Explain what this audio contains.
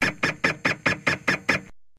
the remixed samples / sounds used to create "wear your badge with pride, young man".
as suggested by Bram